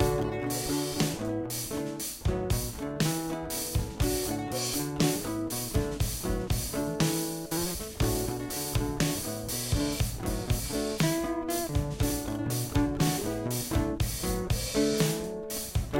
Take Your Time loop
recorded with loop pedal
original, guitar, acoustic, loop, bounce